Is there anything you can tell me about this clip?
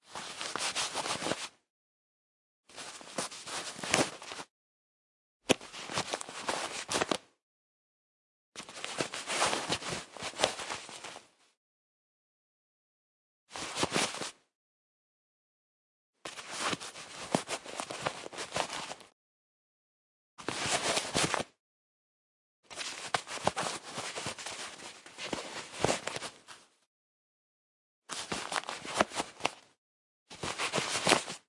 Wallet pulled out and in the pocket
Medium-sized leather wallet being pulled in and back into a pocket, rubbing against cotton cloth.